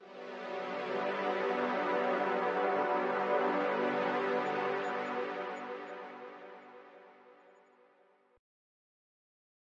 Re-sampled pad at 172 bpm, the key is unknown as i believe it is a chord, it's a mix of g and f